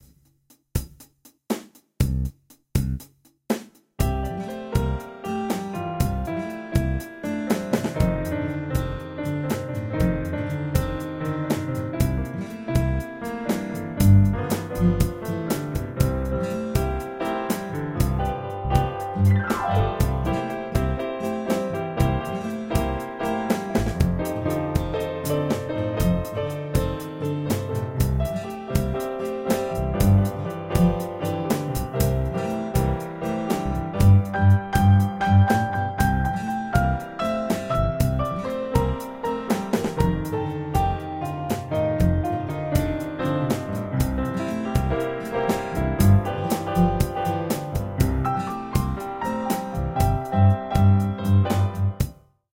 Kitchen Boogie loop 1
Improvised on 3 chords in c major, real garage boogie. tested two different piano sounds. Piano 1.